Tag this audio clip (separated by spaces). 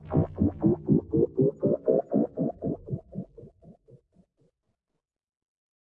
80BPM ambient drone FX spectral